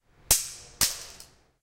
A pen falling into the floor
This sound was recorded at the Campus of Poblenou of the Pompeu Fabra University, in the area of Tallers in the corridor A-B. It was recorded between 14:00-14:20 with a Zoom H2 recorder. The sound consist in percussive and high frequency sound produced by the pens falling and impacting into the floor.
campus-upf, impact, floor, pen, UPF-CS12, percussive